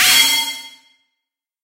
New Skill 01
New skill!
This sound can for example be used in animes, games - you name it!
If you enjoyed the sound, please STAR, COMMENT, SPREAD THE WORD!🗣 It really helps!